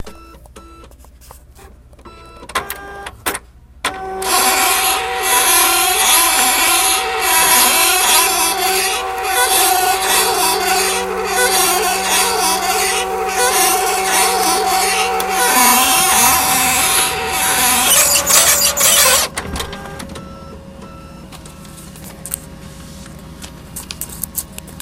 It sounded like a rolling tank from a distance and thought it would make a great stand in for a tiger or sherman in a war video game but up close it sounds like a cacophony of crap.